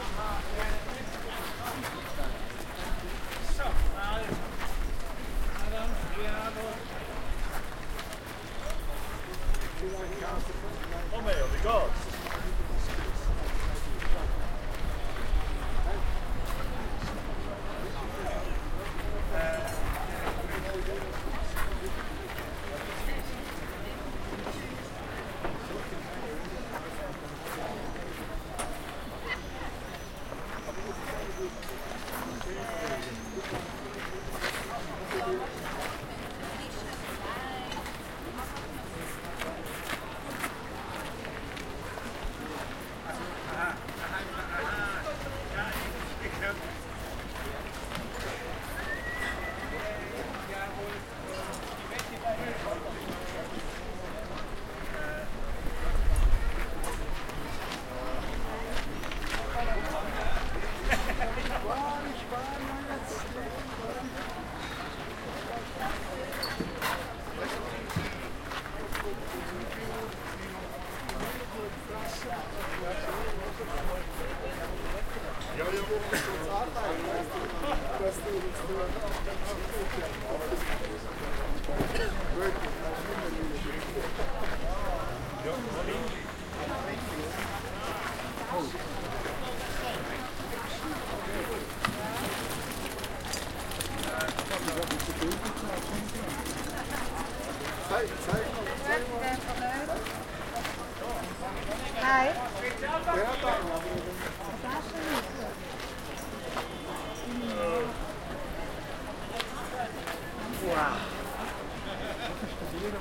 Street market on the Helvetiaplatz in Zurich
fleamarket, gravel, gravelspace, h1, helvetiaplatz, market, outdoor, people, selling, stereo, street, switzerland, talking, wave, zoom, zurich